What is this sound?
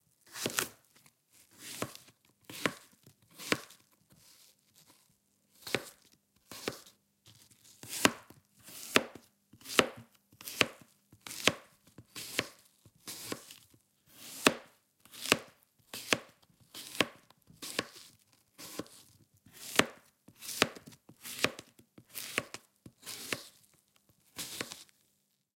Apple slicing
Slicing an Apple on a cutting Board. Please write in the comments where you used this sound. Thanks!
apples, blade, board, cook, cooking, cut, cutlery, cutting, eat, food, fruit, kitchen, knife, point, salad, slice, slices, slicing, vegetable, vegetables, work